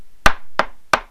Knock on door
Three Knocks on a wooden desk. Recorded With Realtek High Definition Audio Headset. Edited with Audacity.
wood, knock, desk, bang, table, knocking, hit, hard